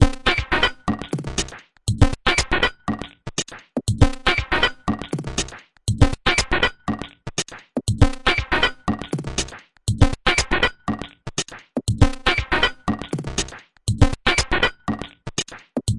alien workshop
industrial, loop